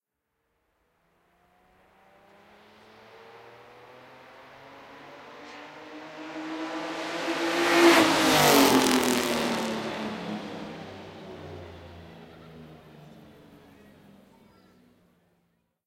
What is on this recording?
zolder mercedes W125 4
Pass by of the Mercedes W125 car on the Belgian Zolder Circuit during the Historic Grand Prix
race, vintage, circuit, mercedes, w125, belgium, historic, engine